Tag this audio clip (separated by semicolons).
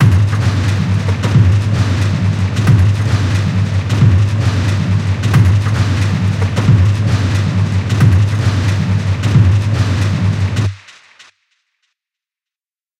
factory; Gent; industrial; industry; machine; machinery; mechanical; noise; rhythm